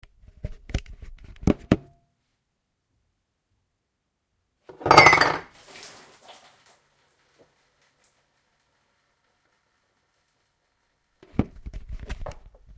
Spill Glass
Me knocking over a cup/heavy glass sand spilling water everywhere.